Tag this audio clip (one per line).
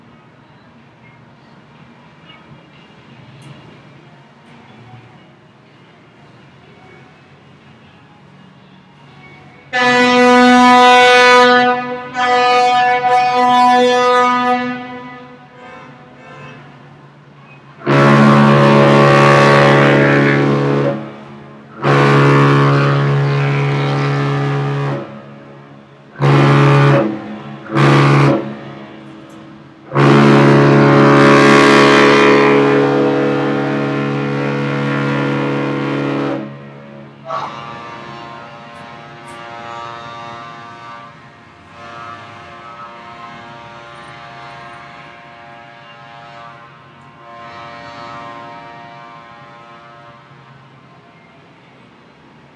hoot
horn
ship
alarm